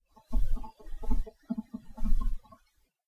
Chippie Galloping Sound Effect
Chippie, Galloping, Icons